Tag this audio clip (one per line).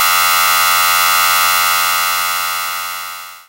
abstract
electronic
freaky
noise
strange
weird